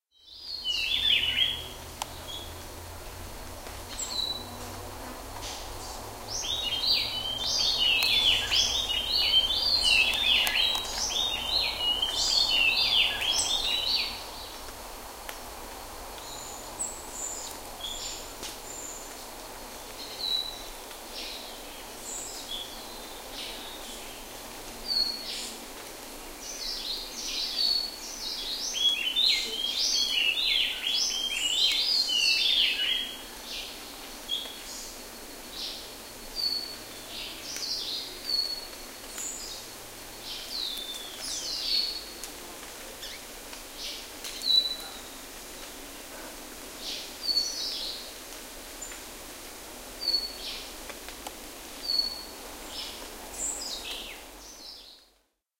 bird in rainforest
Ambient recording of July 2003 during a walk through a small rainforest area in Sian Ka´an Natural Park in southern Yucatan, Mexico. Bird calls and drops of water falling on leaves. Dat Recorder, Vivanco EM35, low frequences filtered.
bird, field-recording, nature, rainforest, tropical